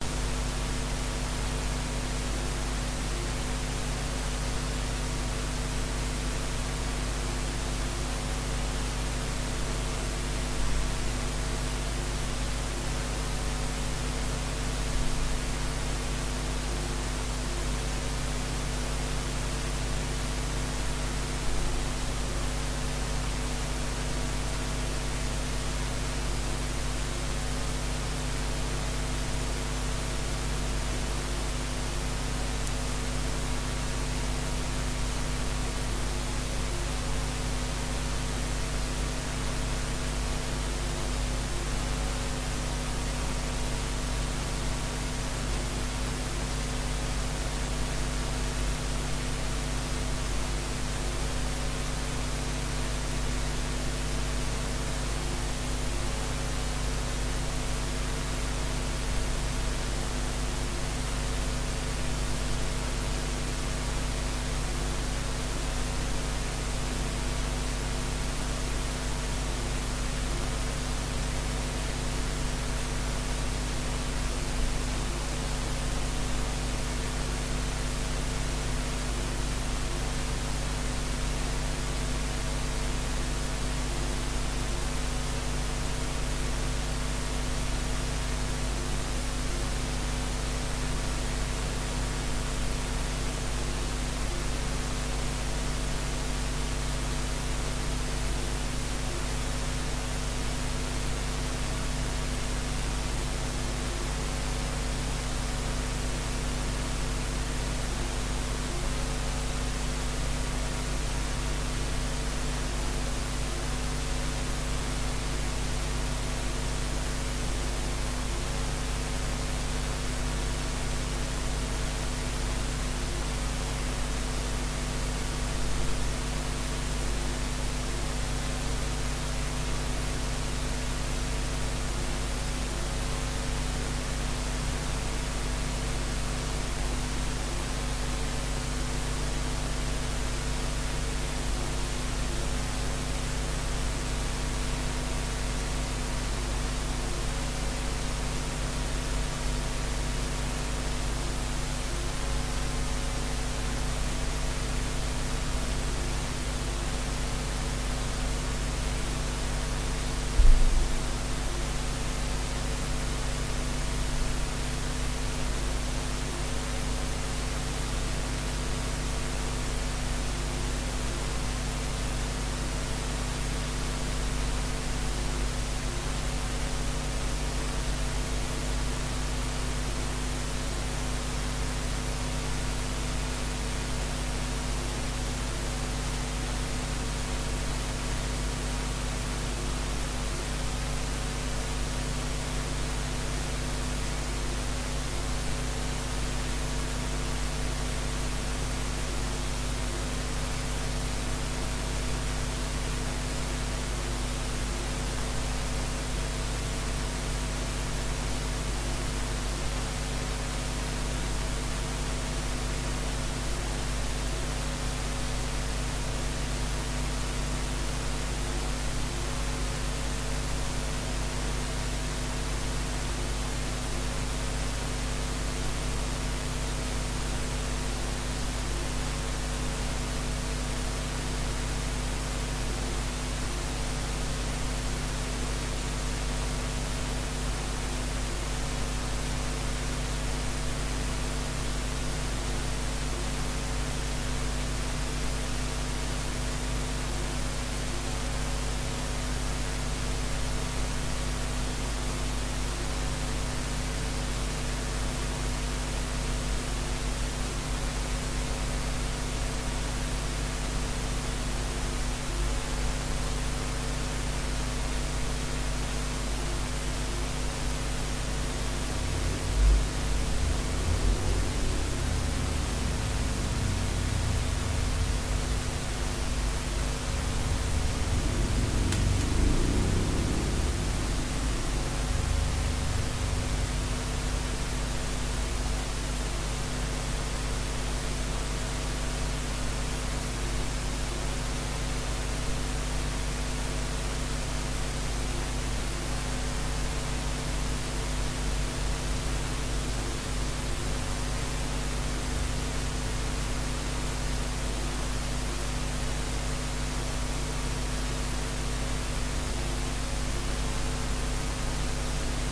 ECU-(A-XX)19+
Trajectory Calculator Electric Accelerator